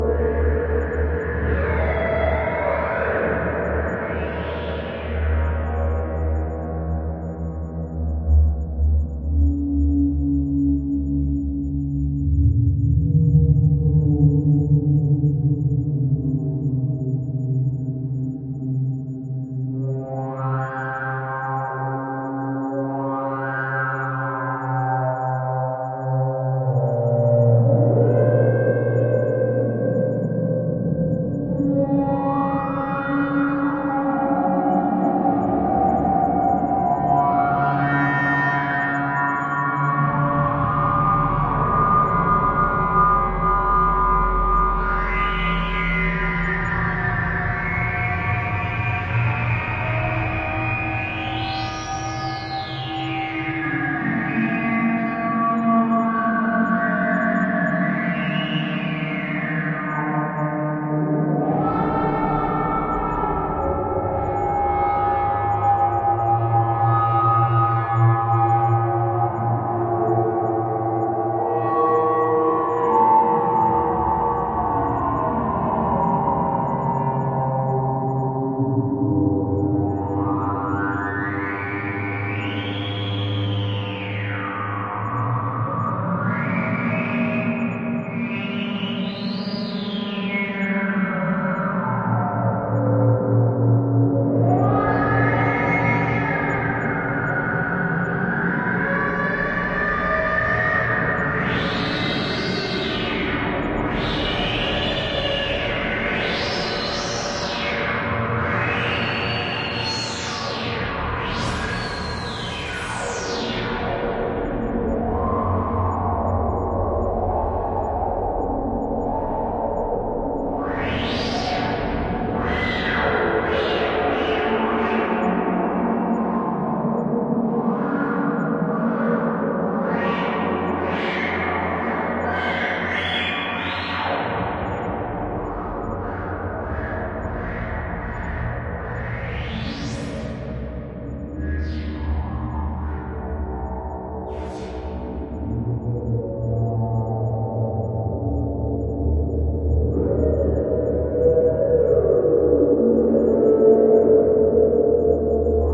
Retro Sci-Fi/Horror [loop] (Ambient 16072016 Part I // SmoothSliding)
Ambient in a weird kind of retro sci-fi sense..
Created with a Korg Monotribe processed by a Zoom Multistomp MS-70CDR guitar multi-fx pedal, using the Church reverb.
If you want to watch how this sound was created:
It's always nice to hear what projects you use these sounds for.
One more thing. Maybe check out my links, perhaps you'll find something you like. :o)
ambient, analogue, atmosphere, complex, dark, drone, eerie, electronic, game, Halloween, horror, long, loop, retro, scary, science-fiction, sci-fi, sinister, space, synth, synthesizer, synthetic, video